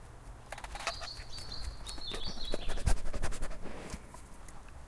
Kyle, the golden labrador, sniffing at the microphone!